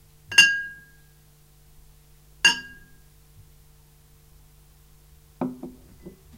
Hitting a wine glass with another glass object.
Recorded with an SM57